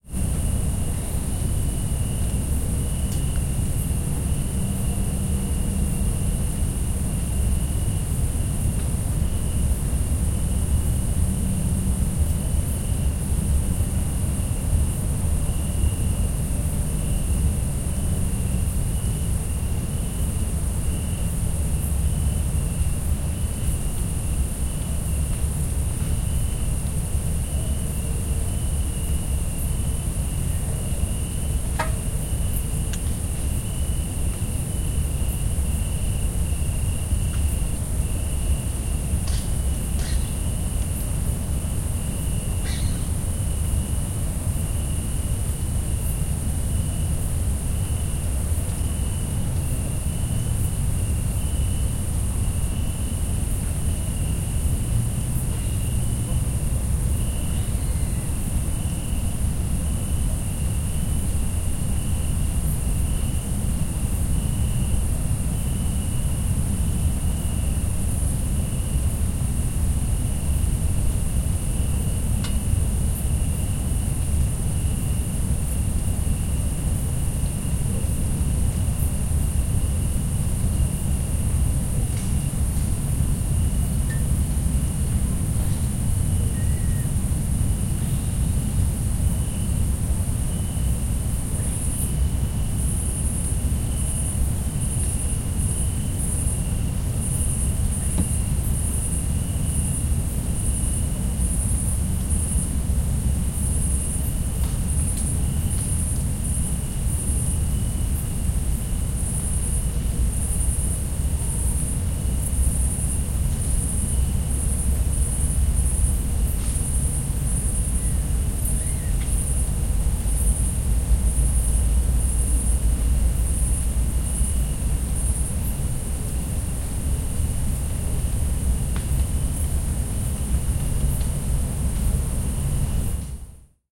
tarjan hungary on the hills 20080711
Recorded near the village Tarján on the hills. You can hear cicadas, crickets, our car cooling down, and distant traffic. Recorded using Rode NT4 -> custom-built Green preamp -> M-Audio MicroTrack. Added some volume, otherwise unprocessed.
car-cooling-down, cicadas, crickets, hungary, night, summer, traffic